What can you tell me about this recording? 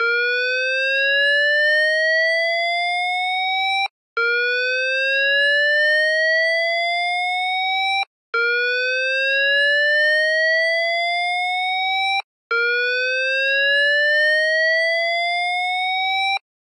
A clone of the Simplex QE90 evacuation tone, popular in Australian emergency systems. It is normally accompanied by verbal instructions. Basically means evacuate to the nearest exit and follow any instructions...
Made in software with some speaker modelling for a theatre show.